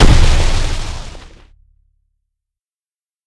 rocket impact
game, games, sounds, video